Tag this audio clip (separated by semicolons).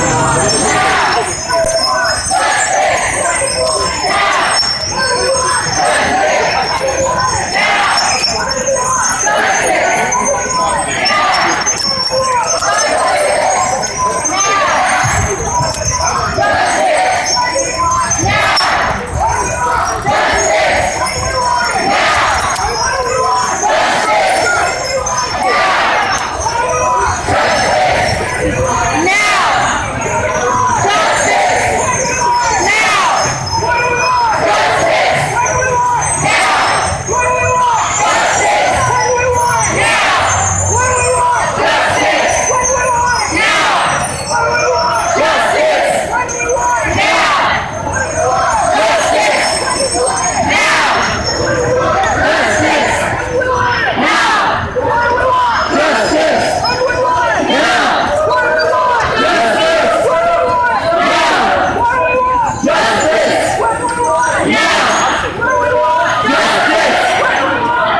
BlackLivesMatter; blm; field-recording; bicycle; chant; protest; politics